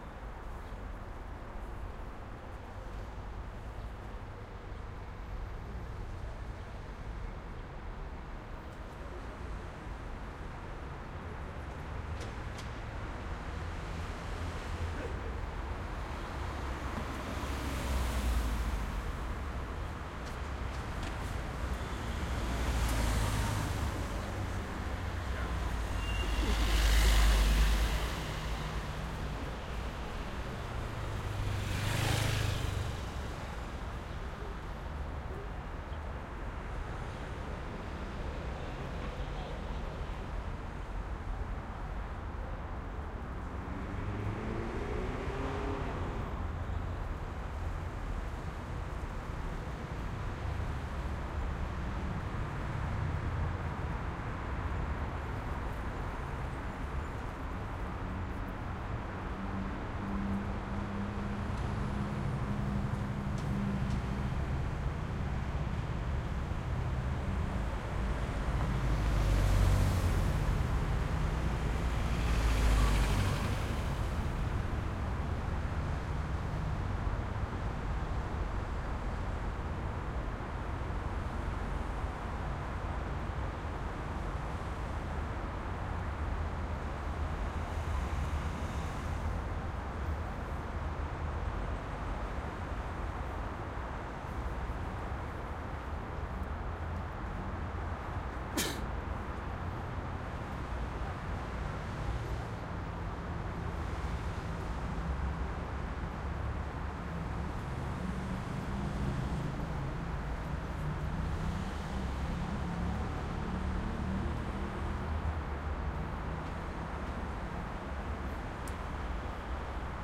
Calidoscopi19 Pont Sarajevo 2
Urban Ambience Recorded at Pont de Sarajevo in April 2019 using a Zoom H-6 for Calidoscopi 2019.
Calidoscopi19, Humans, Monotonous, Nature, Pleasant, Quiet, Simple, SoundMap, Traffic, TrinitatVella